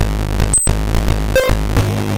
A slow and laidback metallic beat constructed out of nothing else then one LSDJ PWM channel.
drum, electronic, gameboy, loop, lsdj, nintendo, noise